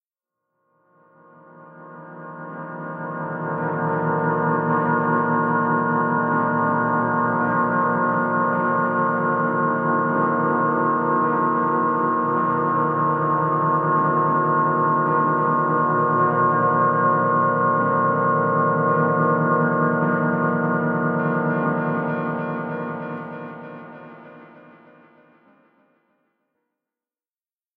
An ambient drone that I found hiding on my hard drive.